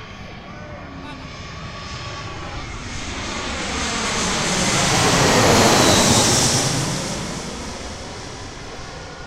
Avió - Deltasona - Juan y Arnau
elprat, Birds, Deltasona, airplane, wind